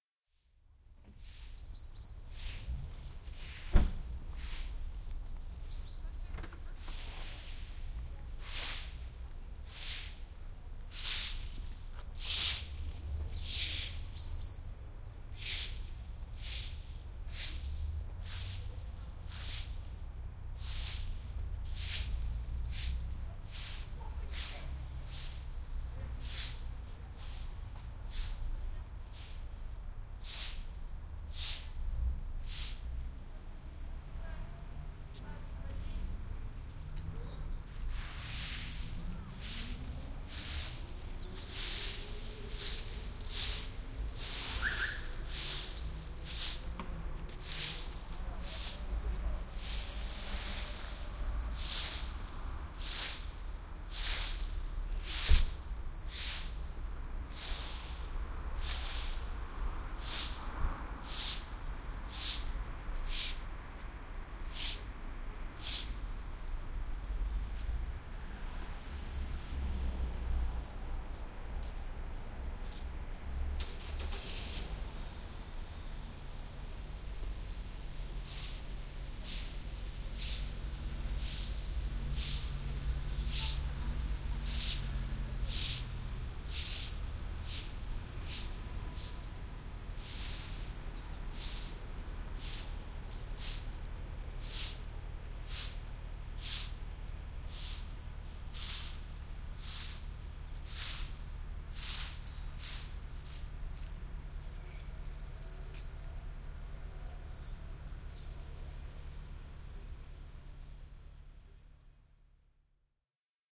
Street cleaner sweeping autumn leaves. Moscow, 08 October 2021
Street cleaner sweeping automn leaves. Moscow, 08 October 2021